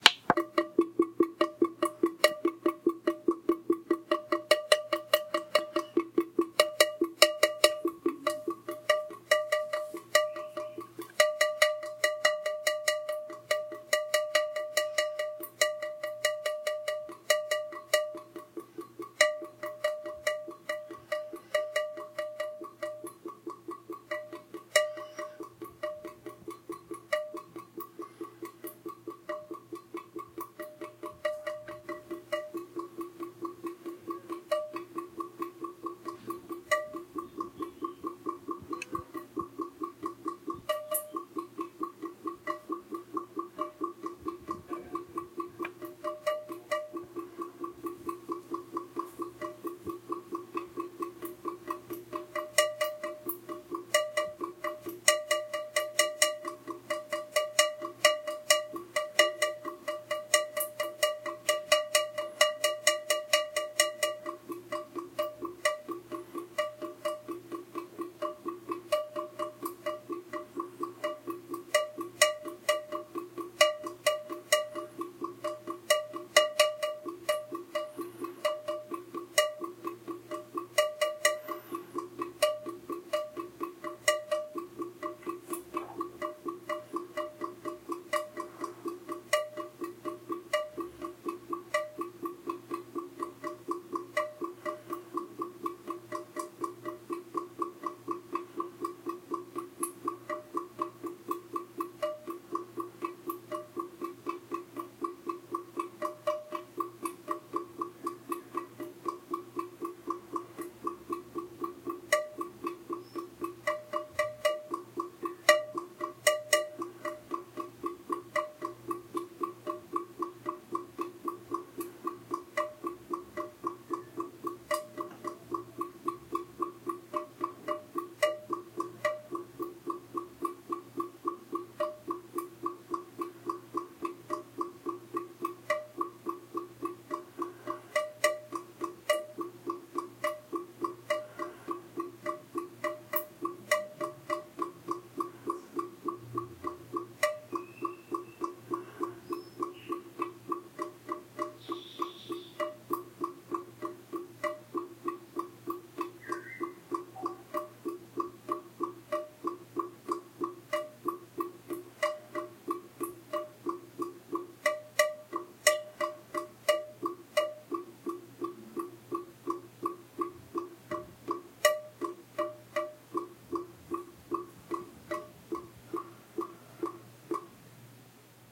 gotejamento - dripping water on shower drain
Water dropping on tile and metal drain randomly, with a distinct rythm that slows down towards the end of the file. Recorded with Zoom H4N embedded mics, quickly edited on ProTools for gain, noise reduction and artifact removal. Still a little noisy, though.